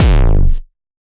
GNP Bass Drum - Shot In 3B
Short distorted kick drum sound with a bit of a slow noise gate.
hardcore, distortion, gabber, kick, single-hit, bass-drum, kick-drum, gnp